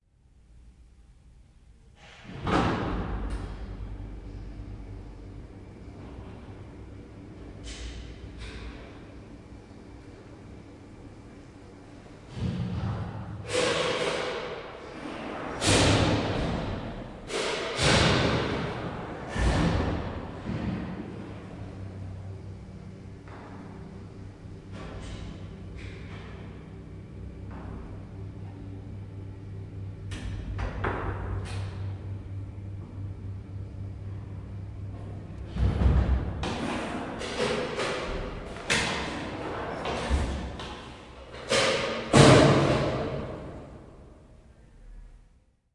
old elevator 2
elevator ride, rattling doors, echoing hallway
ambience, interior